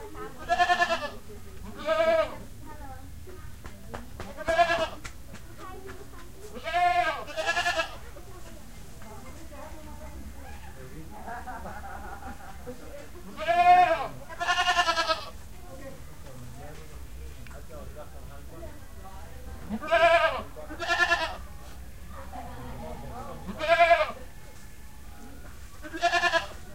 20070619 161243 banjar geiten
Goats in the village of Banjar. Java, Indonesia.
- Recorded with iPod with iTalk internal mic.
Beeeeeh!